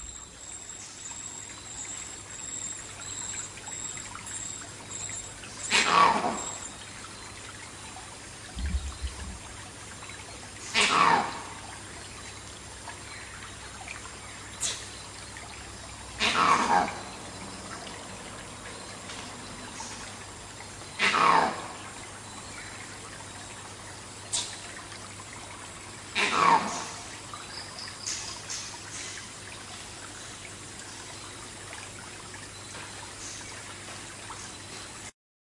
Jungle Night Geko or Monkey Call Creepy
A macaque monkey calling at night, Borneo Malaysia jungle. Some sounds of crickets and a small stream. My first upload! Sony NXCAM with ECM-WM1 shotgun mic.
Some think this is a geko
spooky, stream, malaysia, macaque, borneo, sarawak, field-recording, call, water, crickets, creepy, strange, monkey, night, jungle